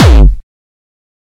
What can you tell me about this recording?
Distorted kick created with F.L. Studio. Blood Overdrive, Parametric EQ, Stereo enhancer, and EQUO effects were used.
bass, beat, distorted, distortion, drum, drumloop, hard, hardcore, kick, kickdrum, melody, progression, synth, techno, trance